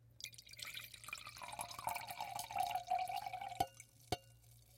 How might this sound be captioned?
Martini Pour into Glass FF305
Slower pour into empty glass, ice hitting metal
glass, metal, ice, pour, hitting, empty